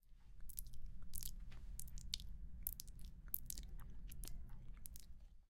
36-Perro comiendo-consolidated
eating, Dog